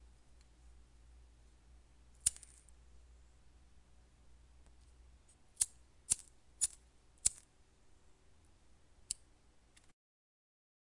a
A lighter trying to be lit which eventually is.
burning, flames, lighter, burn, flame, fire